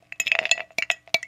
ice sounds 9
ice cubes gently rolled around in a glass
clink, cubes, glass, ice, rocks